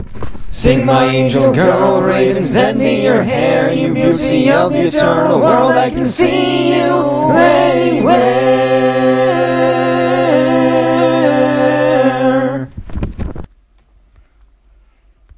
poetry-stanza-sung (4-track)
Sing, my angel girl -
Ravens envy your hair;
You beauty of the eternal world -
I can see you anywhere.
Also see
desire, happy, longing, love, sing, song, poem